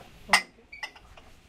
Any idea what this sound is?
tasas chocando 4
bar, coffe, shop